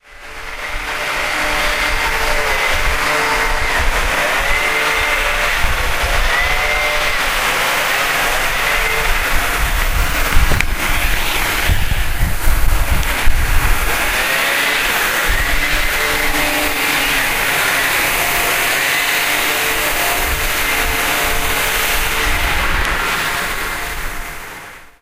This sound was recorded with an Olympus WS550-M and it's the sound of the sweeper's vehicle cleaning the streets and a wind machine that collects the fallen leaves.